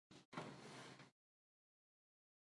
27. Movimiento silla
move, object, sit